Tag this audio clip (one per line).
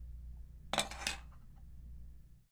random
table
Putdown
Spatula
Mask
Oven
Wood
Kitchen
cook
Pickup
Bake
Baking
Metal
Furnace
Makingamask